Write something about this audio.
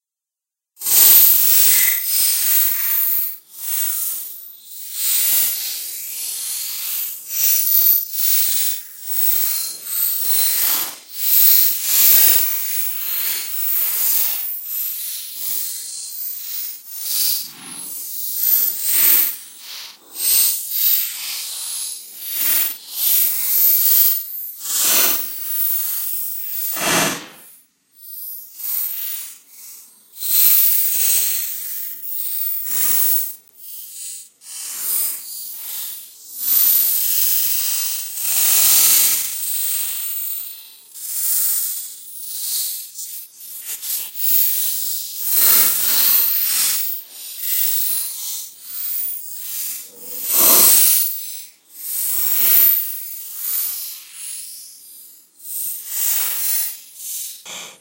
Sound obtained with the keys' noise, with the modification of the tempo, and other effects so as to slow down or accelerate by places